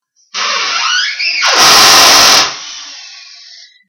Battery Powered Drill1
Here is a sound created by my uncles battery powered drill while he was remodeling our kitchen. Also don't forget to checkout all of the sounds in the pack.